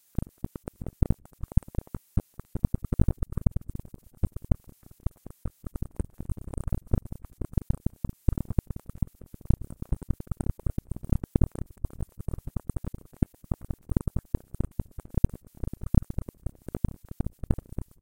More low clicks and crackling sounds... All sounds were synthesized from scratch.
atmosphere, dry, fx, hollow, insects, minimal, minimalistic, noise, raw, sfx, silence